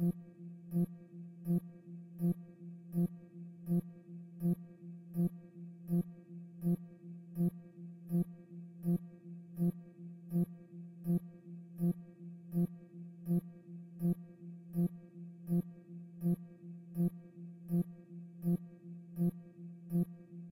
A futuristic alarm sound